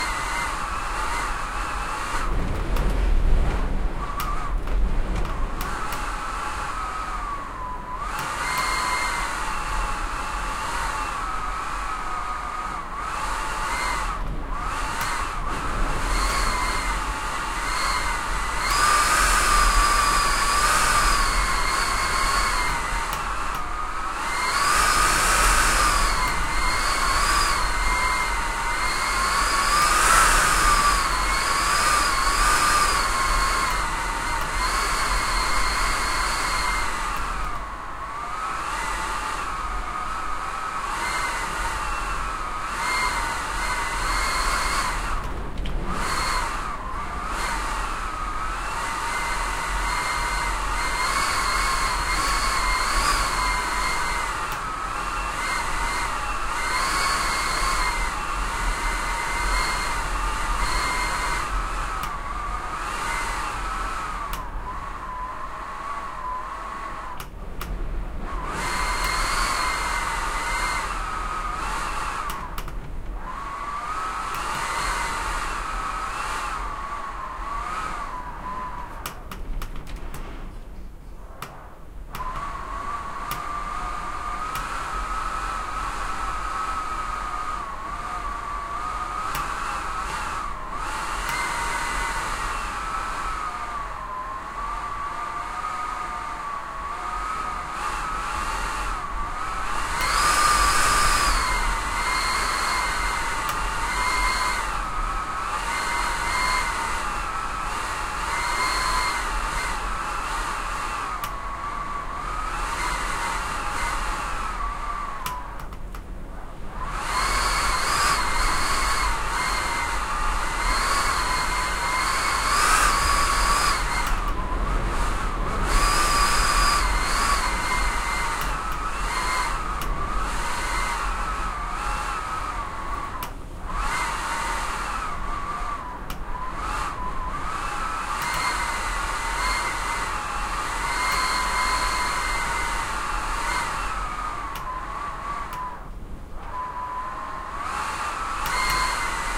A door is trying to keep itself closed with a strong wind (very fast thanks to the mountain). You can notice the cracks: it's old, more than 30 years old.
I left a wall clock running by mistake, but I think it can't be noticed. If you do and don't want it, I have another recording with it stopped (same name, number 2)
Recorded with a Zoom H4n.
Credit is optional: don't worry about it :) completely free sound.
scary, bad